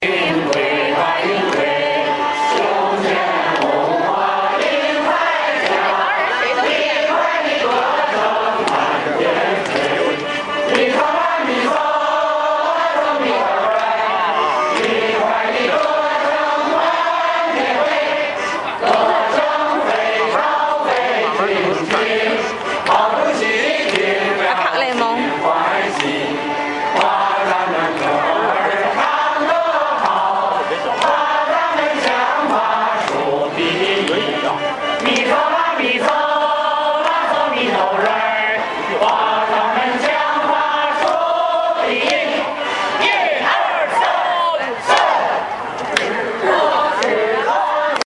Jing%20Shan%20Activity%202
A short recording of a group of old folks singing in Jingshan Garden in Beijing on a Sunday morning.
old-folk-singing, beijing